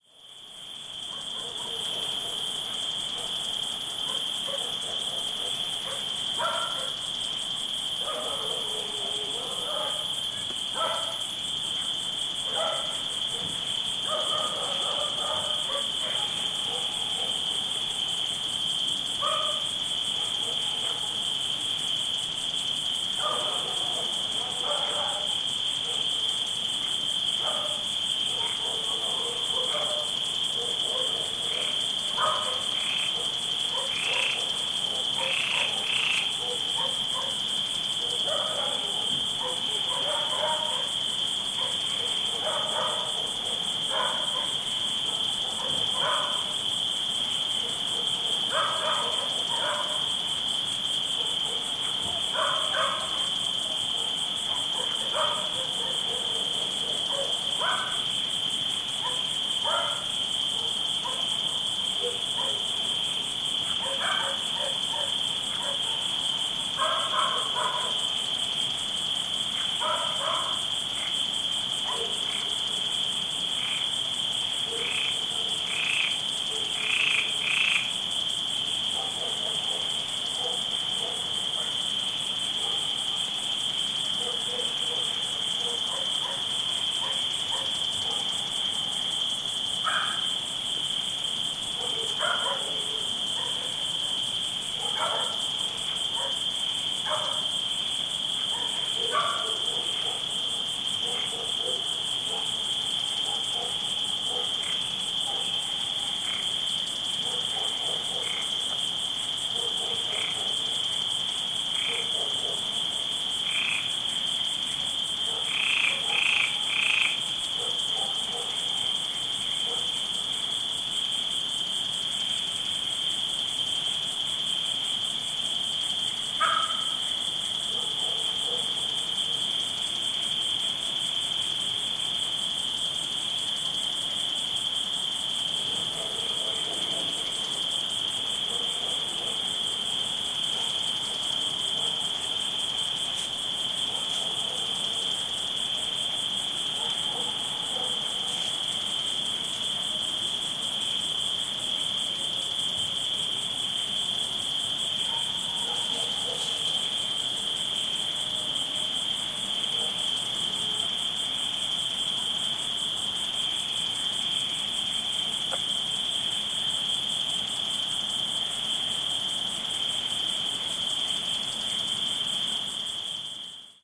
Night ambiance in a central Spanish country house: distant dog barkings, crickets chirping, frogs croaking every now and then. Recorded near Madrigal de la Vera (Cáceres Province, Spain) using Audiotechnica BP4025 > Shure FP24 preamp > Tascam DR-60D MkII recorder.